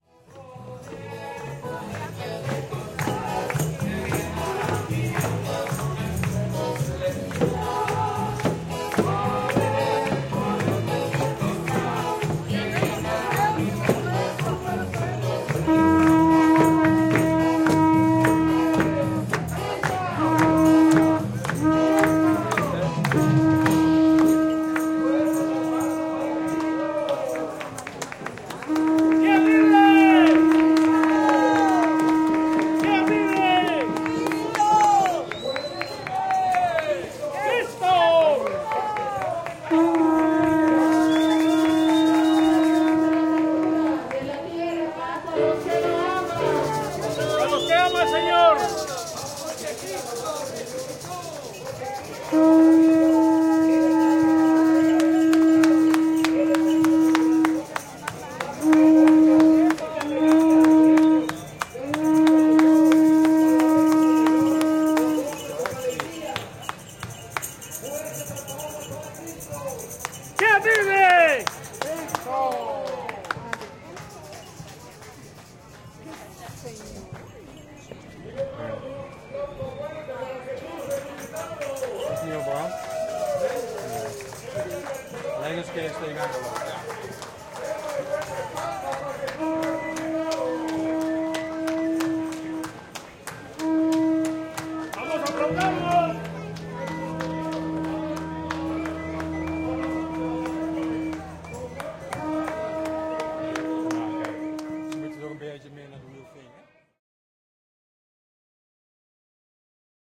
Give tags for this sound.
field-recording
village
ms
Atmosphere
Semana-santa

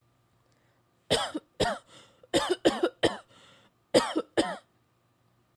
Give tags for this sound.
coughing
tossindo
woman

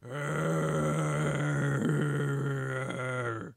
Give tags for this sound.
zombie
brains
dead

